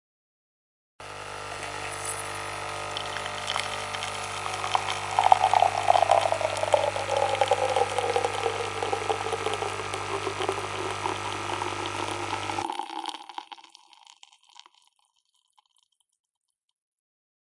Coffeemaker make coffee